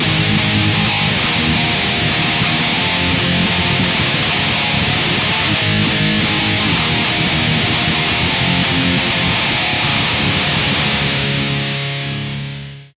a nu rock sounding riff, openly strummed recorded with audacity, a jackson dinky tuned in drop C, and a Line 6 Pod UX1.